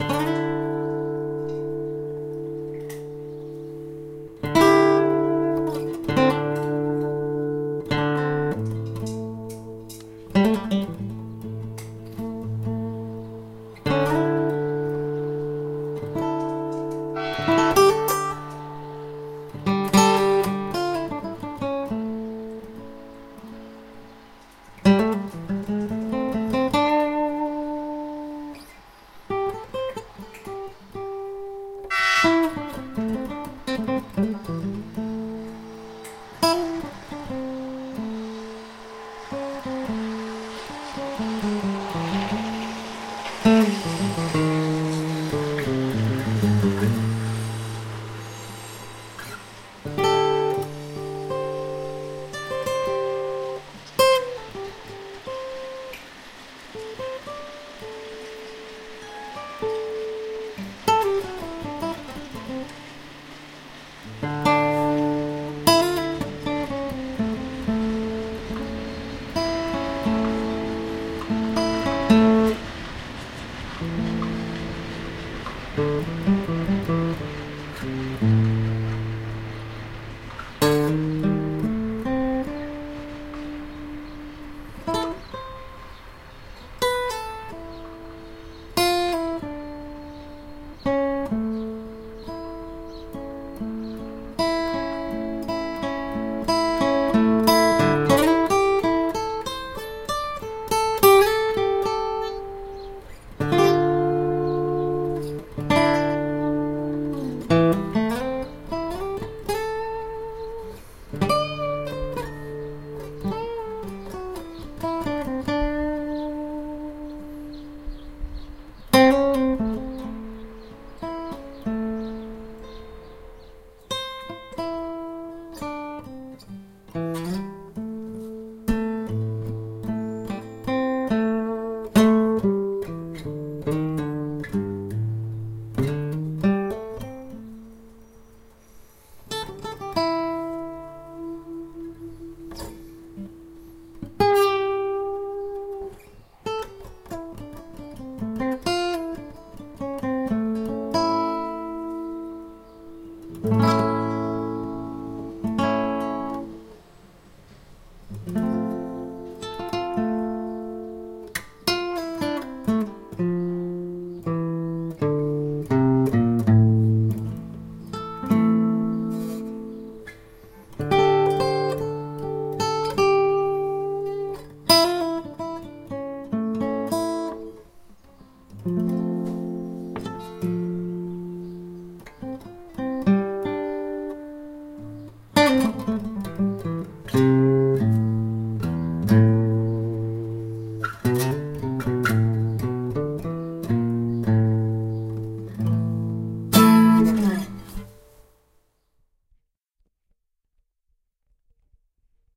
A train is coming through my window
The sound of a train comes through my window while I improvise with my acoustic guitar.